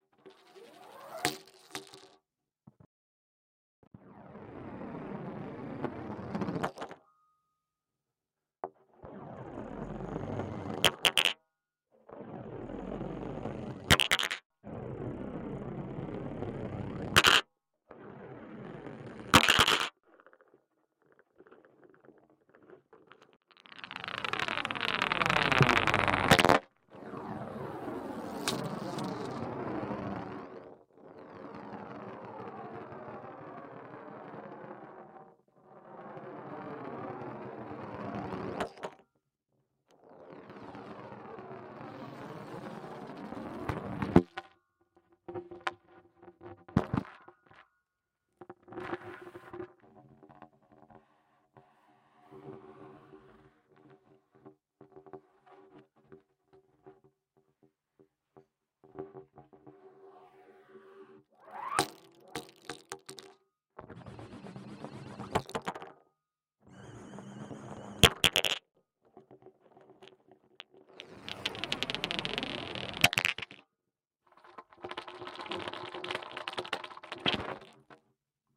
HOSE PVC 03
A marble is rolling true a hose pipe, microphone is placed into the hose pipe.